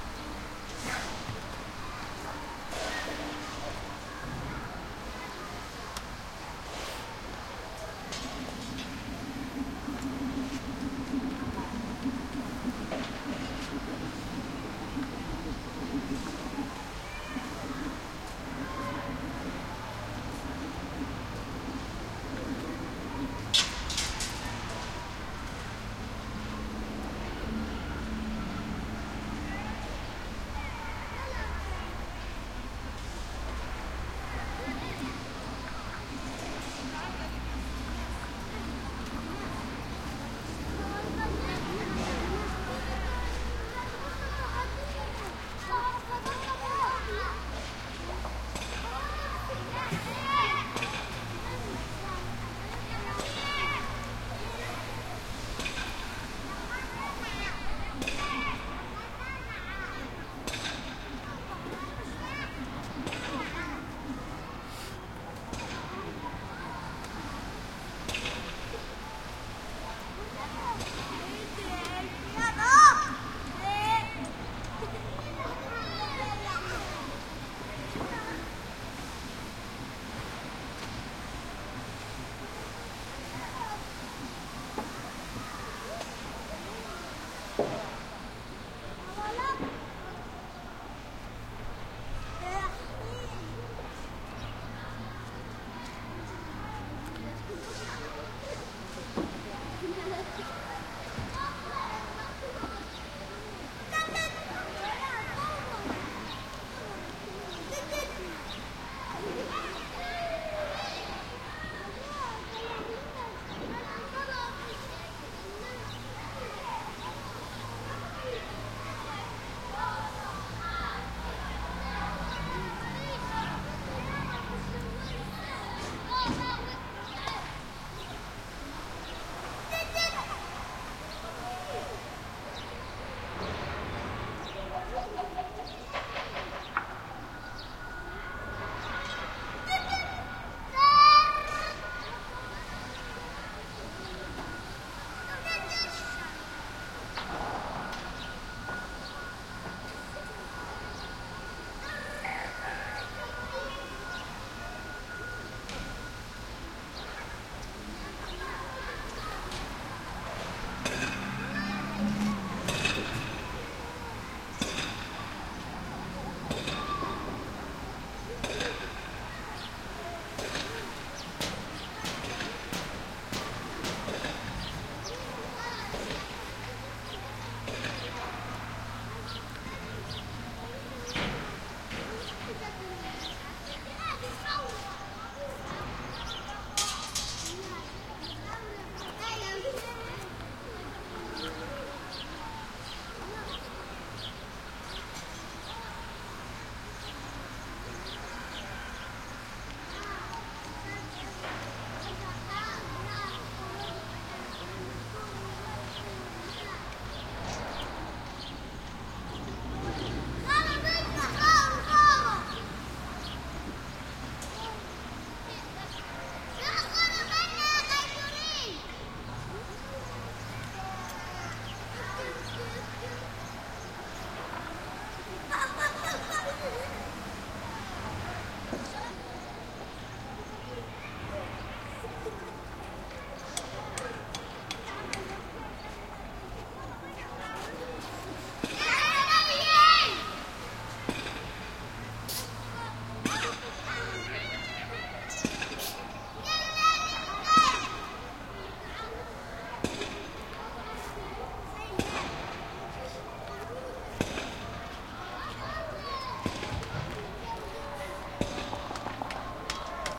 ruins nearby Palestinian children kids playing in rubble with distant construction echo2 Gaza 2016
ruins, children, Palestinian, kids, playing